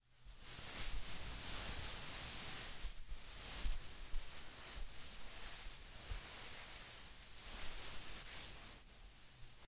Foley effect with the purpose of simulating smoke